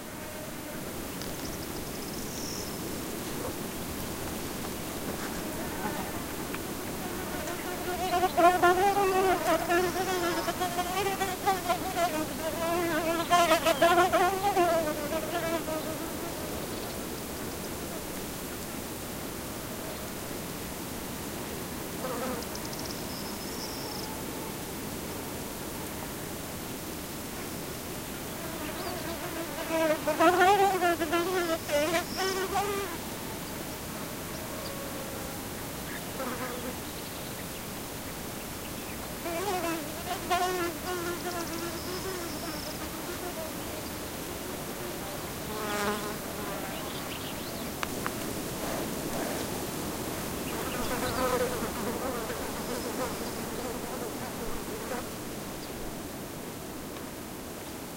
Sandwasps (Bembix) flying close to the ground on a windy day, birds in background. Rycote windshield > Sennheiser K6-ME62+K6-ME66 > Shure FP24 > iRiver H120. Unprocessed / Avispas volando muy cerca del suelo un día de mucho viento